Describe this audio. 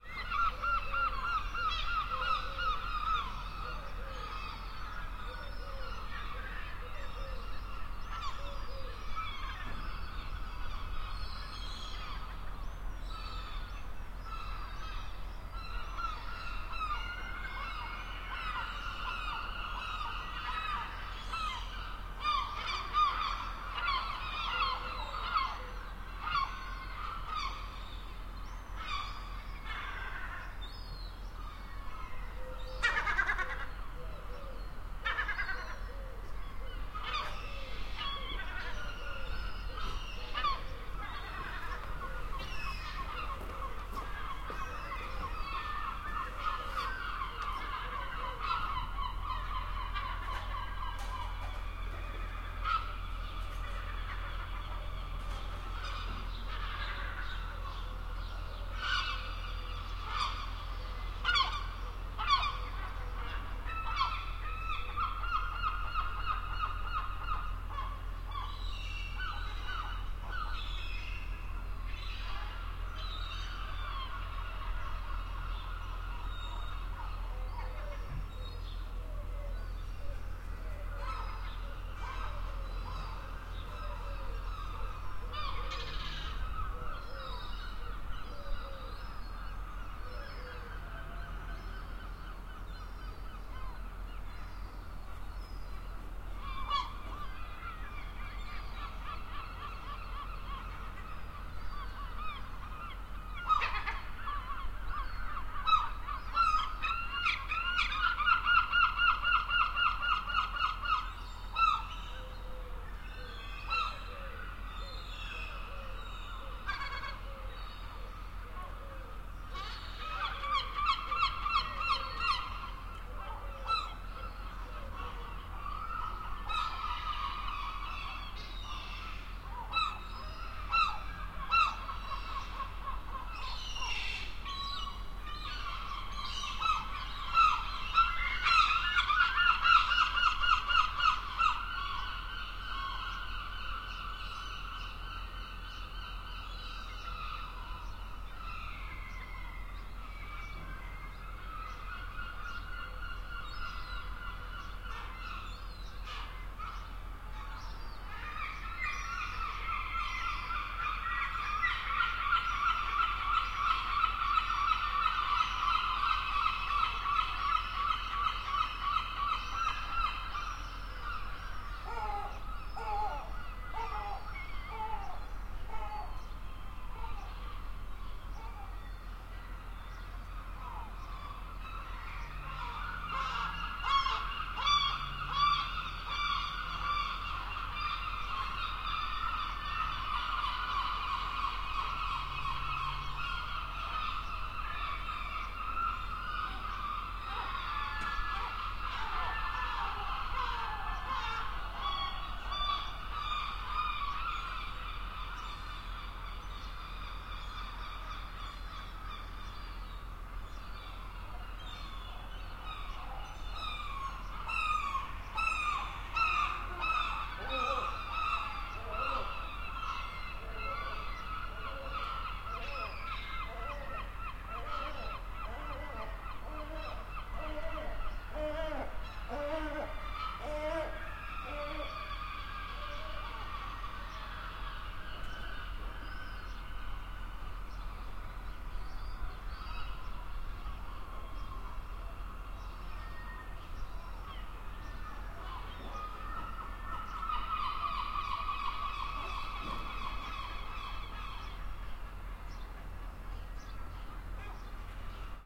Seagulls in a quiet street, 6AM, Harbour City
Seagulls in a quiet street of Cherbourg (France, normandy) at 6AM during july 2018
ambience, Cherbourg, city, field-recording, harbour, morning, quiet, Seagulls, street